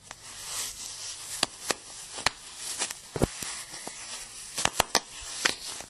The sound of a rubber
stress ball being manipulated.
pressure, beads, rubber